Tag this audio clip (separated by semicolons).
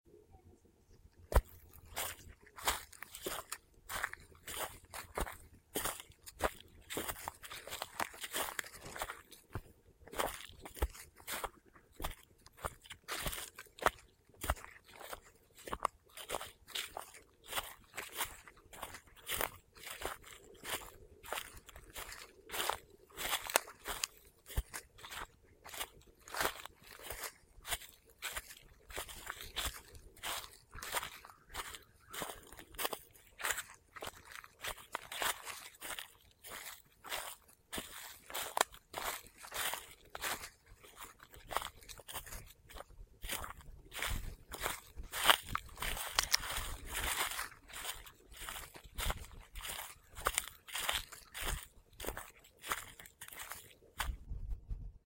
crunch; foley; leaf; leaves